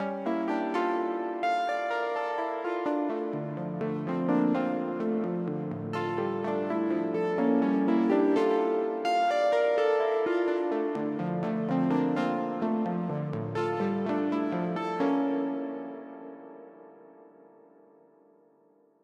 124 BPM, self-made FM8 patch.
string, synth, asdf, swing, fm8, electronic, sinsky, melody